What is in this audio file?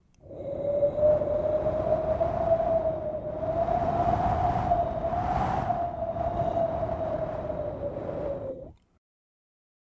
Wind Arctic Storm Breeze-003
Winter is coming and so i created some cold winterbreeze sounds. It's getting cold in here!